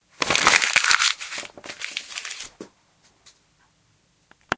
Recorded with a black Sony digital IC voice recorder.